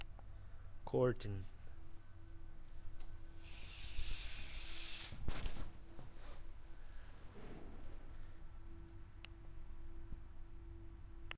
MySounds GWAEtoy curtain1
TCR, recording, field